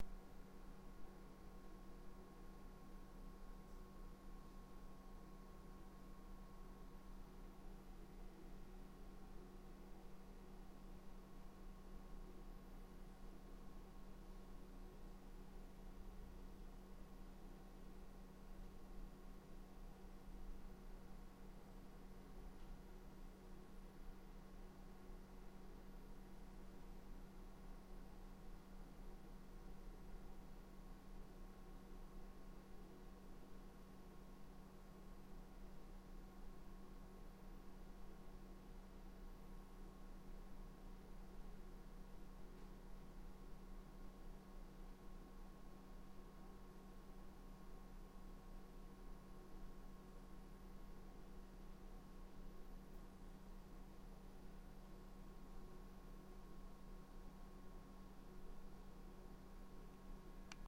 Room tone 041
Room tone recorded with an Alesis Two-Track near a loud electrical device in a basement.
room, indoors, tone